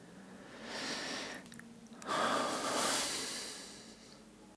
inhalation and sigh. Sennheiser ME66 >Shure FP24 > iRiver H120 (rockbox)/ inspiración y suspiro